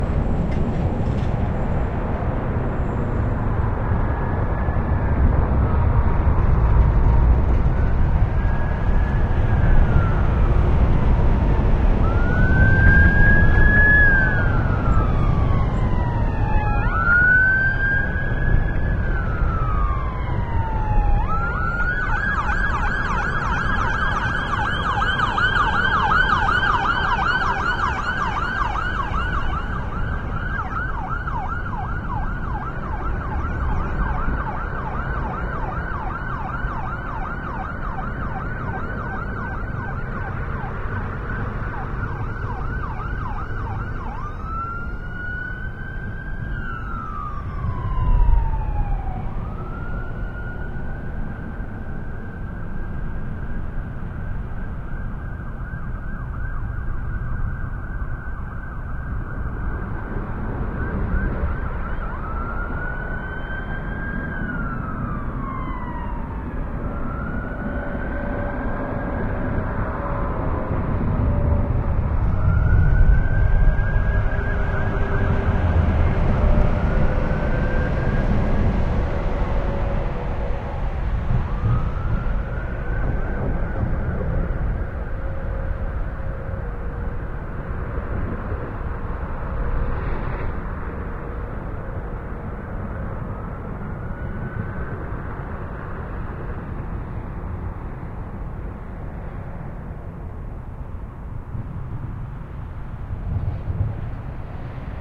Ambulance Pass
Ambulance enters from left heading down freeway ramp directly in front of me. Sirens can be heard eachoing off of walls to the right on approach. Ambulance then makes left turn heading away from mics through freeway underpass and than winds around the streets faintly echoing as it makes its way to the hospital ER entrance just directly opposite the freeway mound from recorder.
In the spirit of sounds being free I don't process, resample, tweak, add effects or modify sounds in post other than trimming for desired bits to leave the sample as open as possible for everyone to rework, sample, and process as they see fit for use in a
doppler, ambiance, traffic, emergency, city, road, sirens, soundscape